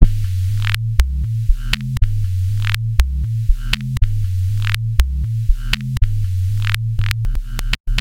bassline with clicks e c 120bpm-13
basslin with clicks e c120bpm
acid, ambient, bass, bassline, bounce, club, dance, dub-step, electro, electronic, glitch-hop, house, loop, rave, seq, sequence, synth, synthline, techno, trance